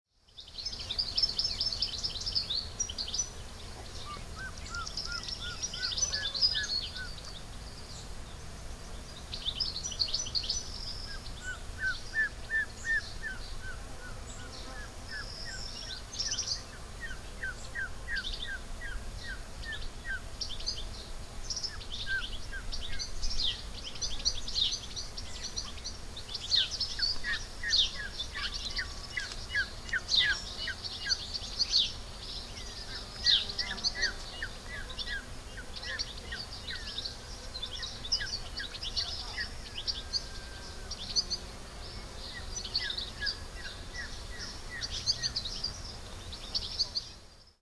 short toed eagles
Short toes eagles are occasional visitors to us here near our home in the hills of Andalucia, Spain. In this case there are three circling above the adjacent hill. I consider myself luck to get this recording...as they are normally too high to pick up the calls clearly, and even with the small bird chatter in the foreground, was pleased with the results. Can do better tho ...watch this space!:)
circaetus-gallicus, culebrera, eagle, eagle-call, eagle-cry, short-toed-eagle